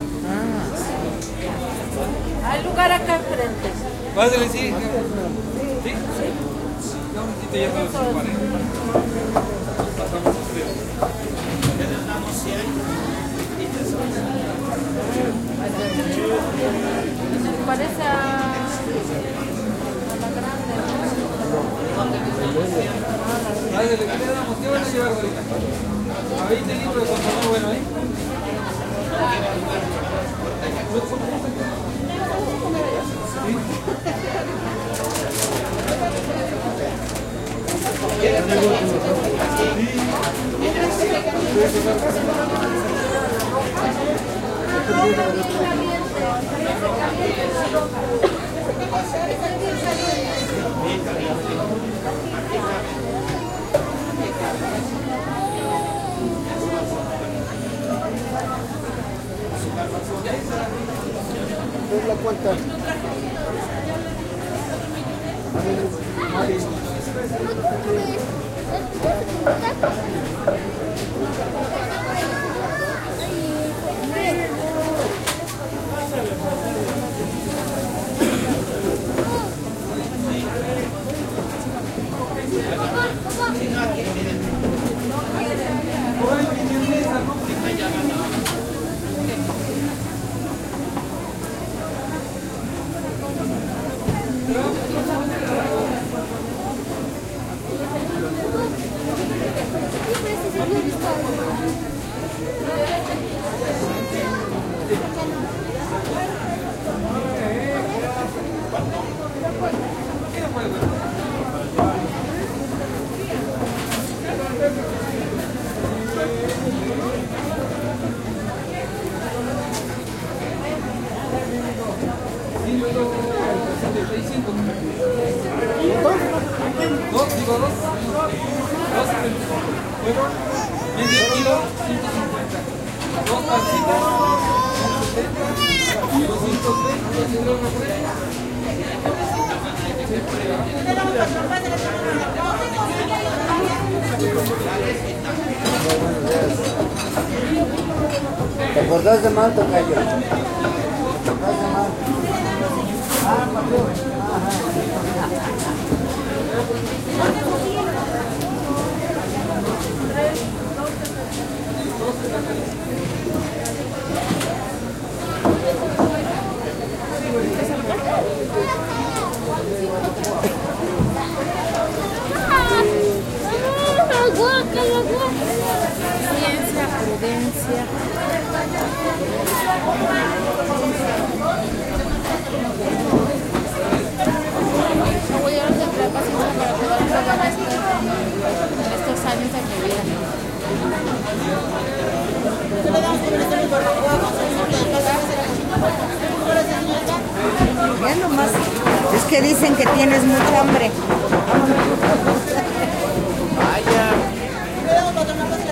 Barbaco Mercado Apan003
Restaurante de barbacoa en mercado de Apan Hidalgo. Mexican BBQ tipical restaurant in México.
bullicio, comiendo, eating, gente, hablando, people, Restaurant, Restaurante, talking, walas